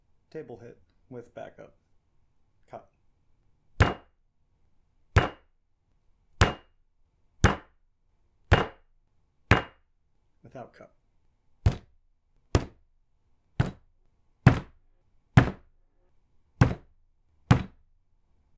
Wood Table Hit - w and wo cup - BU on R
Hitting a wood table with a fist. Multiple samples. With and without cup on wood table. Backup recorded on RIGHT CHANNEL for alternate sound. On Zoom H5.